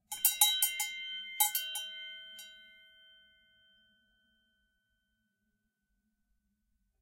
Recorded with my Edirol R-09, with an Austrian cowbell suspended from a length of elasticated cord.
mechanical-shop-door-bell, old-fashioned-door-bell, old-fashioned-shop-bell